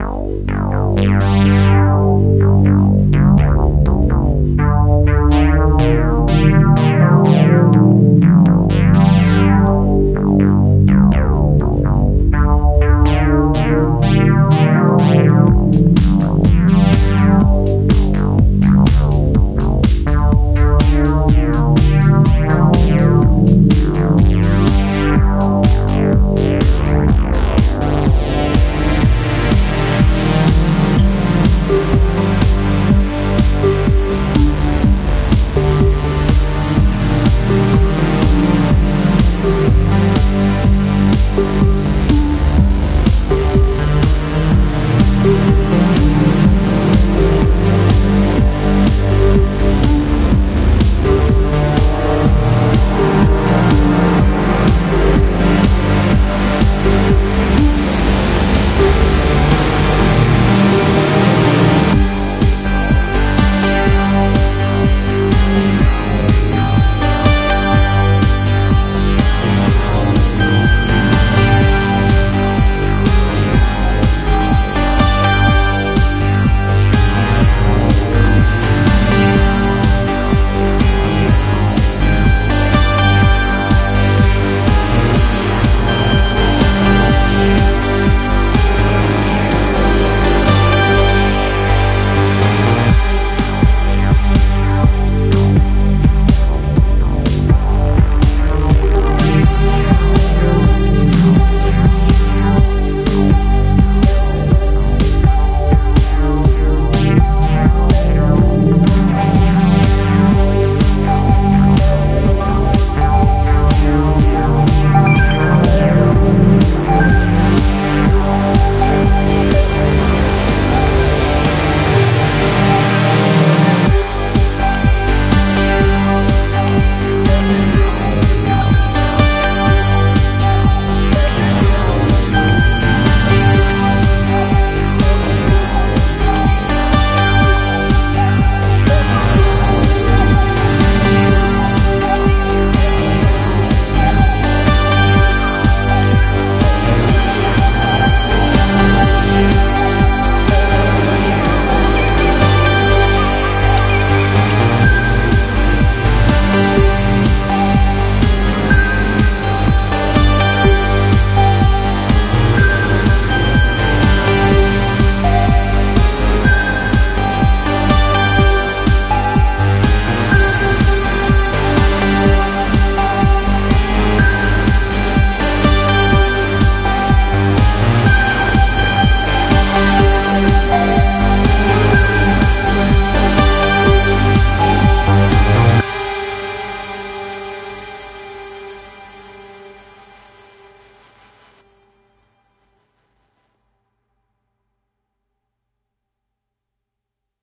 Synthwave / Techno Song Created with a Novation Circuit
February 2019